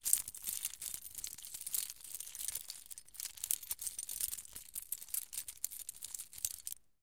keys - rustling 01
rustle, rustling, metal, metallic, keys, clink, jingle